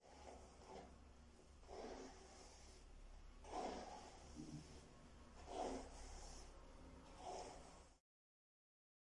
Sound when combing hair